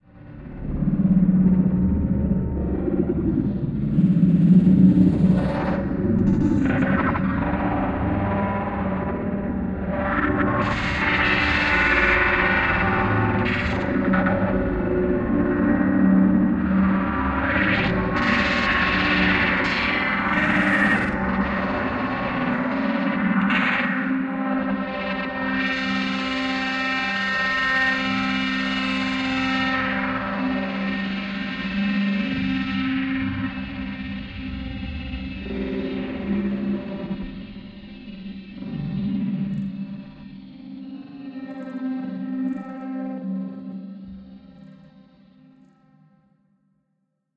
A brief abstract soundscape utilizing samples manipulated in Alchemy, recorded live to disc in Logic and processed in BIAS Peak.
abstract, electronic, feedback, processed, resonance, soundscape